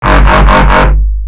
Dub Sound x4 G3 140bpm "Ahr"
A dubstep saw made and modulated in Sytrus (FL Studio). 140bpm in G3. Left raw and unmastered for your mastering pleasure. Repeated 4 times at 140bpm. "Ahr ahr ahr ahr"